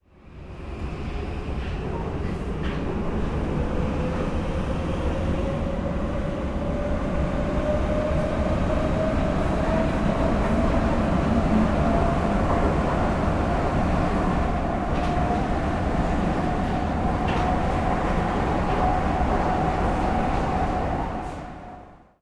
metro goes
sound of metro between stations
CZ, Panska